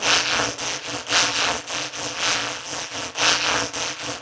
shaker sounds distorted and looped
bitcrusher, sounddesign, distortion, reaktor, shaker, echo, experimental, dub